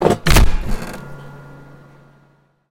Big mechanical stomp